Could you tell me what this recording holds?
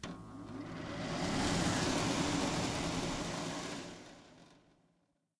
Séchoir mains Laura BEGUET M1 Scénar
This sound is the noise of an hand-drier, recorded in the university's bathroom, with just an effect of dissolve closure.
C'est un son continu complexe.
1)Masse:
Son seul complexe;
2)timbre harmonique:
Son terne, sourd.
3)Grain rugueux.
4)Allure:
Pas de vibrato.
5)Dynamique:
Attaque douce et graduelle.
6)Profil mélodique:
Variation serpentine.
hand-drier, hygiene, maintenance, Audacity